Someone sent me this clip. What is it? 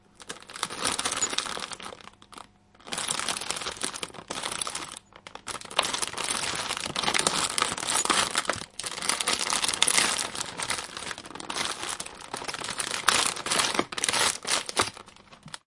cookiecrack amplified
crunching, crackling, random, cracking, crack, crunch, cookie, scrunch